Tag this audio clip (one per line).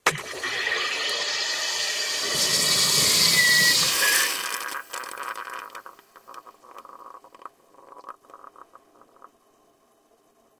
acid
admit
Carbonated
carbonic
close
Kohlensure
recorded
Sodaclub
zugeben